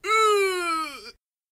screaming male effort
Male Screaming (Effort)
Screaming, Effort, Home, Esfuerzo, Esfor, Cridant, Gritando, Hombre, Scream, Crit, 666moviescreams, Male, Grito